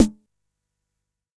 Snares from a Jomox Xbase09 recorded with a Millenia STT1